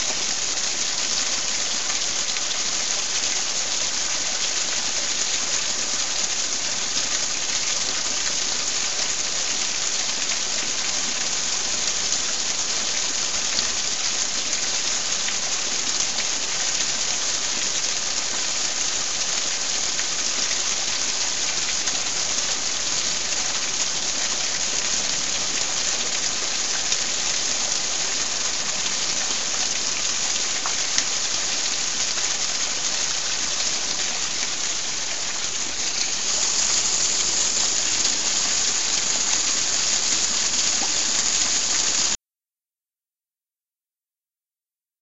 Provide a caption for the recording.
Recorded with MP3 player Mono. Cascading water down cliff face on to rocky beach on the Lizard Cornwall. This on is as close as i could get.
waterfall, field-recording